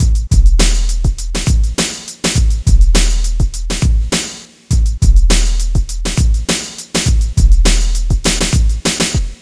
Contact Boom 102
acid, beat, boom, dance, drum, hard, kit, skool, trip